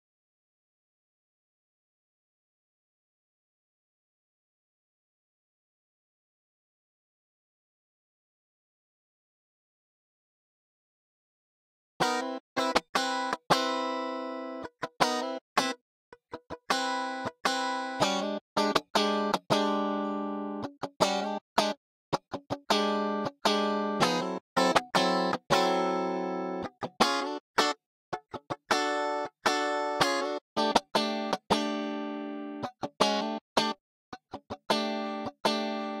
Song1 GUITAR Fa 4:4 80bpms
80, beat, blues, bpm, Fa, Guitar, HearHear, loop, rythm